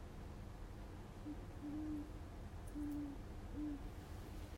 Low Owl Hoot
An owl hooting in my neighborhood in Illinois. After some research, I think it may be a great horned owl. A little noisy. Recorded with an iPhone 8.
bird, eerie, great, horned, night, owl, spooky